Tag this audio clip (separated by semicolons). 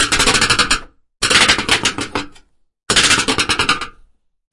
core; drum; iron; rec; snare